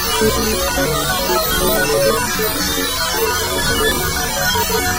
Computer Lab FireBirdVST
A loop based on melodies generated by the freeware Firebird VST, interpreted as the computers communicating in an alien laboratory.
cyber, vst